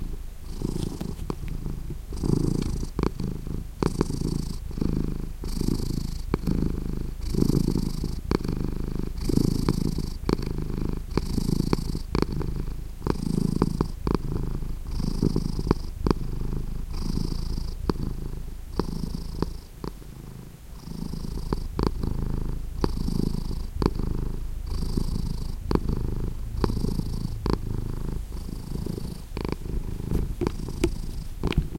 a cat called drake purring